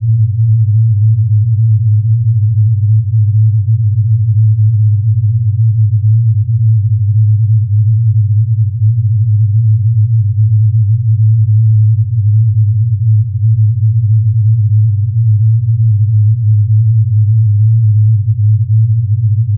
These were made for the upcoming Voyagers sequel due out in 2034.